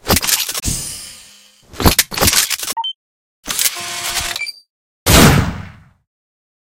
Scifi Weapon 2
army, weapon, reload, military